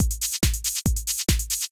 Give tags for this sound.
house; club; electro; shuffle; trance; electronica; tr-808

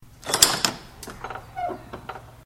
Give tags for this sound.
Handle; Wooden; Opened; Open; Doorway; Door; Clank; Church; Wood; Squeak

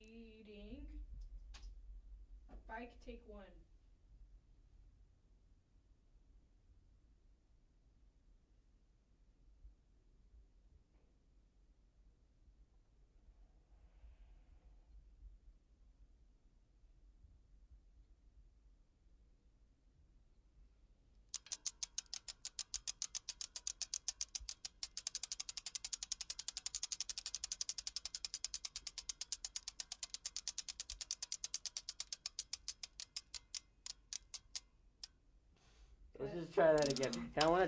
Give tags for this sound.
Foley; Film; Bike

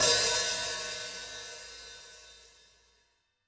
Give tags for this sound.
drum; heavy; hi-hat; hihat; kit; metal; rockstar; tama; zildjian

